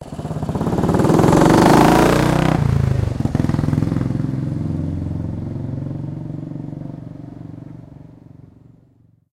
moto guzzi motorcycle starting
starting, guzzi, motorcycle